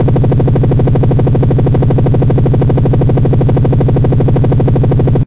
Original track has been recorded by Sony IC Recorder and it has been edited in Audacity by this effects: Change speed and pitch